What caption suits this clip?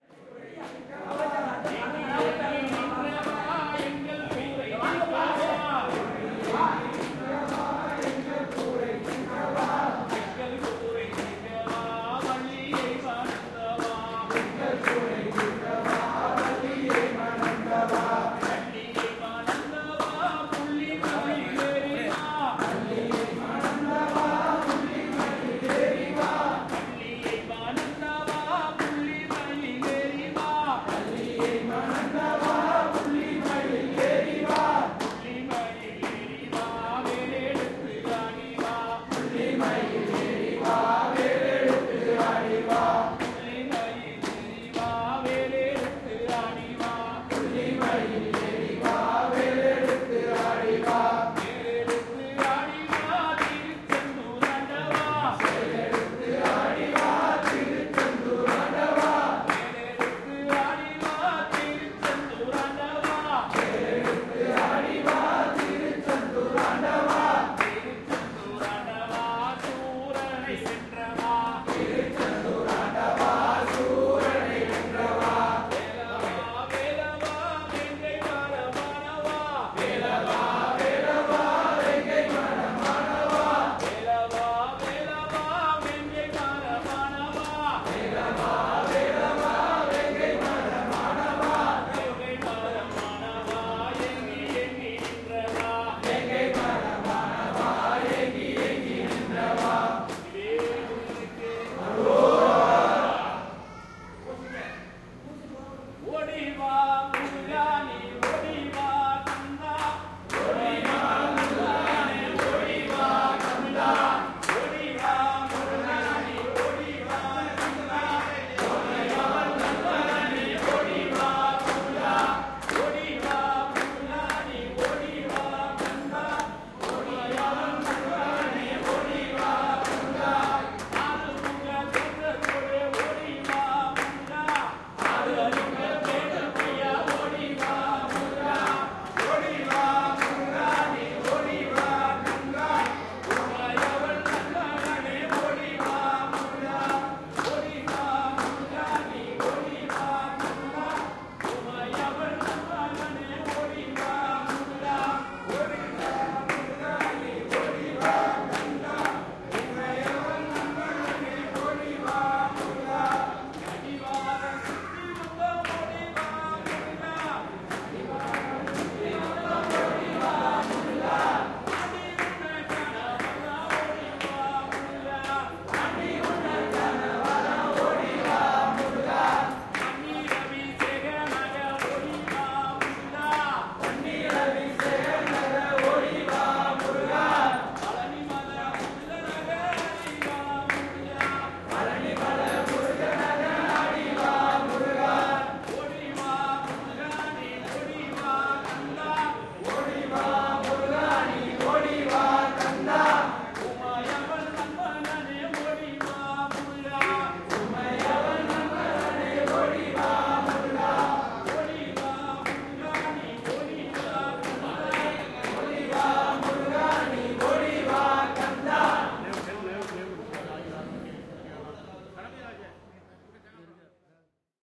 hindu prayers singing ritual songs in the temple (Pūjā)
Prayers singing in Varasiddhi Vinayakar Temple, Darasuram.
ZoomH2N _ MS side
Name me if You use it:
Tamás Bohács
chant, clap, crowd, ecstasy, folklore, hindu, india, j, mantra, p, pray, prayer, religion, religious, singing, temple